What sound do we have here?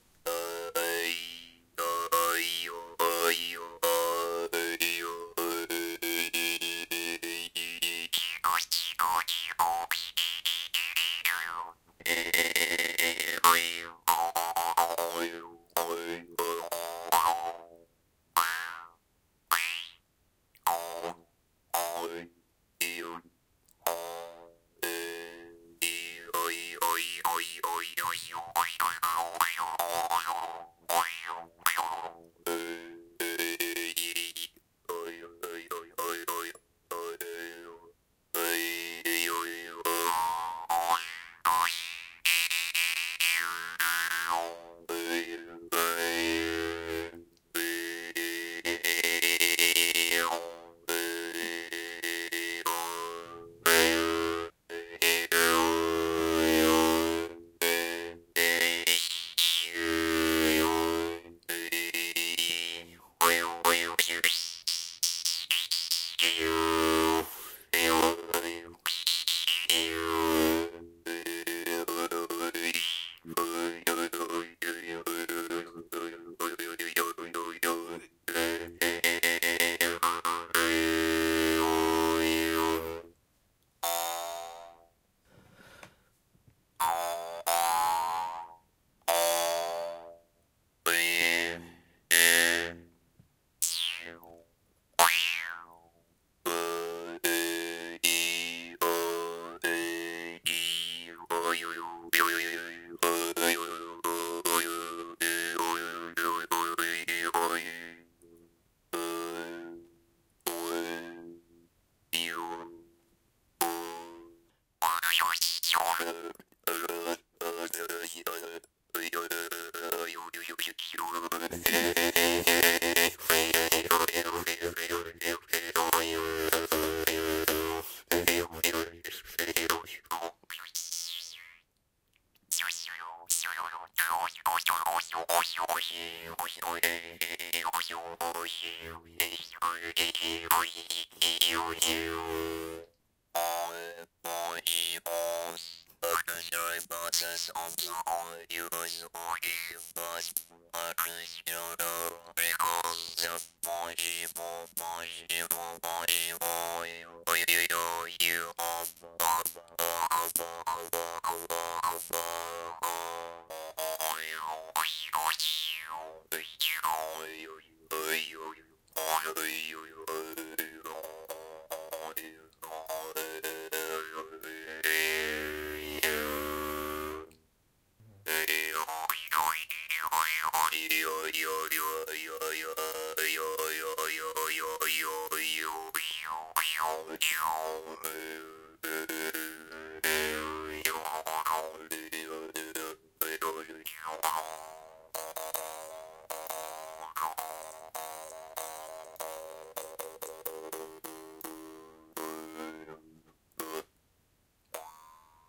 Turkic Jews Harps Improv
Two kinds of Jew's Harps (mouth harps), from the instrument collection of my friend in Kashiwa, Japan. She did a a really nice improvisation that includes low and high sounds, calm and crazy patterns, and even a kind of vocoding (synthesizer voice like sound) near the end.
Recorded with Zoom H2n in MS-Stereo.